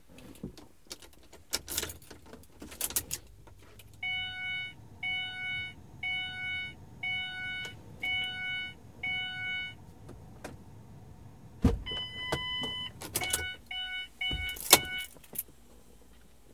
Ford Escape electronic noises
Sound of the interior electronic noises turning on and off in a Ford escape.
bong, Car-lights, Car-noise, Ding, electronics